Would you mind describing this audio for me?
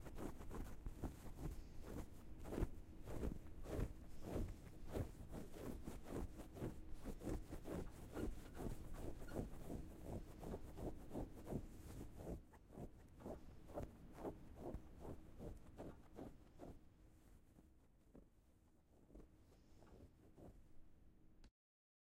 Recorded using a Zoom H6 recorder. Sound made by scratching finger nails along a couch pillow with a silk cover over it.